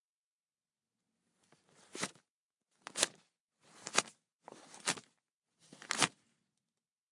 counting 5 bills
Counting out 5 bills.
cash-register, money, cash